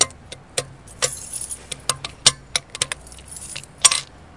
the sound of me locking my bike into a metal bar. theres mixed the
noises of the padlock against the metal bar with the sound of the keys
pealing, with some distant traffic noise at the background.